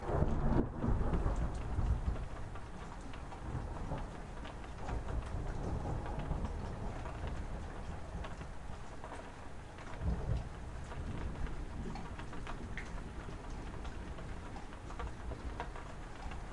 inside-thunder2
A small crack of thunder from a rainstorm in Southern California, as heard from inside my home.